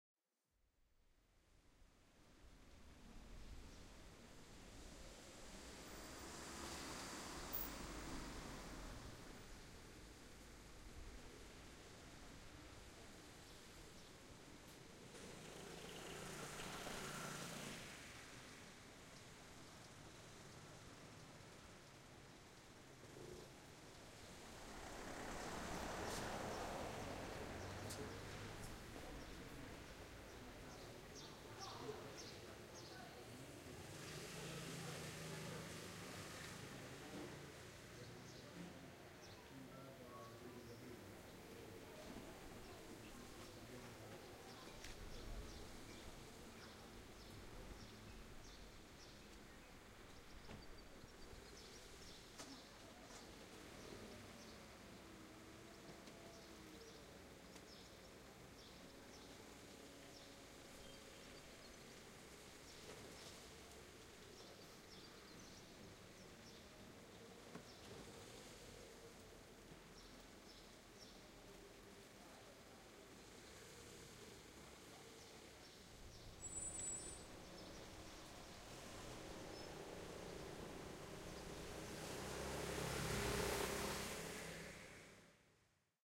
City Street Ambience
A field recording took with two Samson CO2 in a narrow spaced pair arrangement from a balcony at the 1st floor in Roma, Italy.